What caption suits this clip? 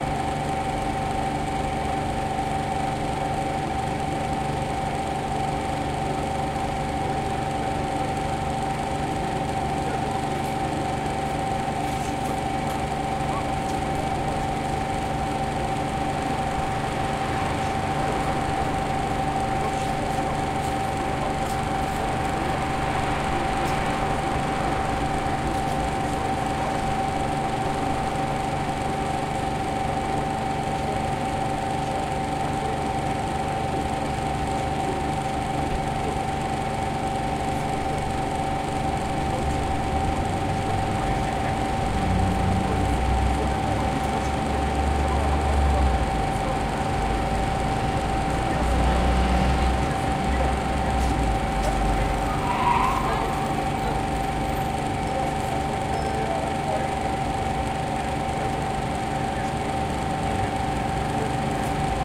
air conditioning 1
Hum of air conditioning split-system (outdoor part).
Distance ~ 2.5 meters.
Recorded 2012-10-13.
Omsk, hum, split-system, city, conditioning, air-conditioning, noise, Russia, air, street, town